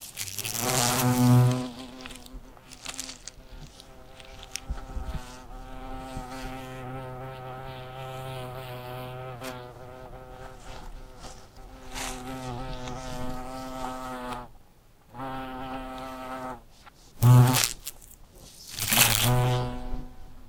Bee buzzing
buzzing, buzz, insect, Bee, fly